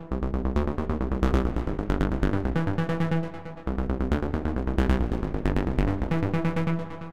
Pcyc synthBase moveup
A nice introspective and moody element to offset the accompanying top-end loop.
16th-note, bass, introspective, synth, synthesizer, synthetic